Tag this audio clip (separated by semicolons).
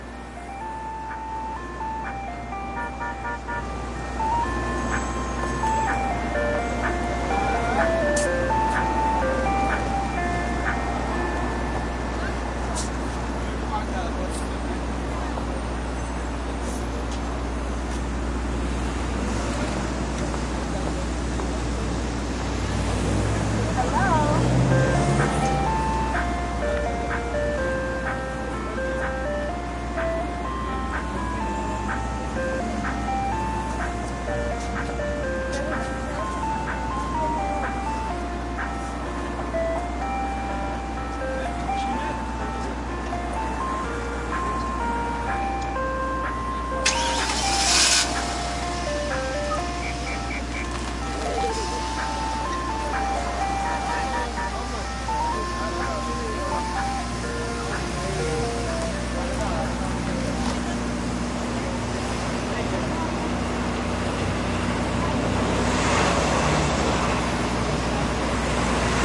brooklyn; cream; ice; new; nyc; song; york